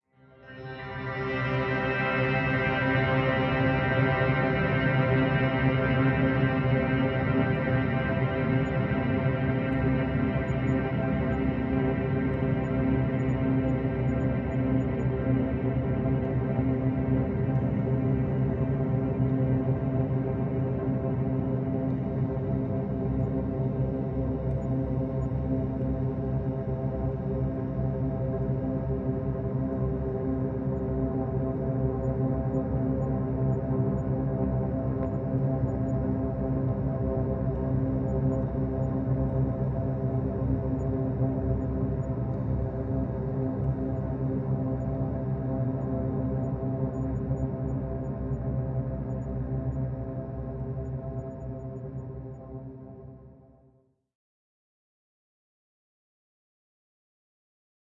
Industrial: Standing above a reactor, atmospheric. Liquid drops, sizzle, reverb, large room, scary. These sounds were sampled, recorded and mastered through the digital audio workstation (DAW), ‘Logic Pro X’. This pack is a collection of ambient sounds stylised on an industrial soundscape. Sampling equipment is a ‘HTC Desire’ (phone).